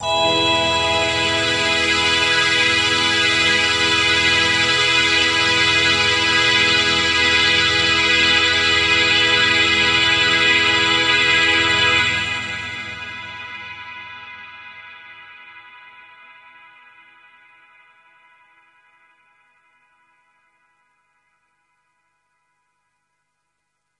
Space Orchestra E3
Space Orchestra [Instrument]
Space, Orchestra